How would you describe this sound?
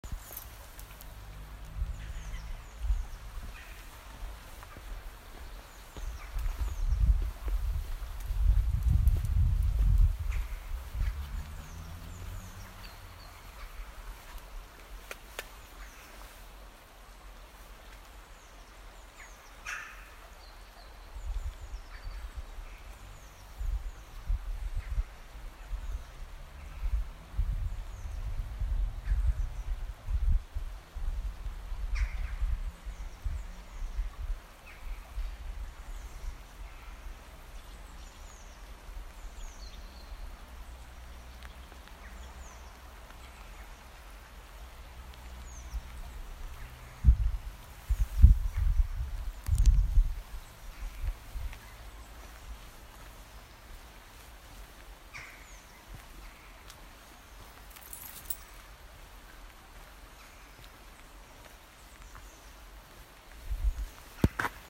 A murder of crows chatting in the trees - winter day